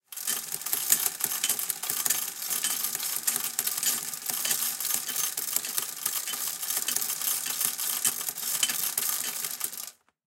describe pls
Bike, Chain Spinning, Fast, 02-01
Audio of a bicycle pedal being pushed, pulling the metal chain around some metal cogs and gears for a metallic, rhythmic clunking.
An example of how you might credit is by putting this in the description/credits:
The sound was recorded using a "Zoom H6 (XY) recorder" on 13th February 2019.
bikes, metal, spin, gear, spinning, pedal, bike, chains, chain, bicycle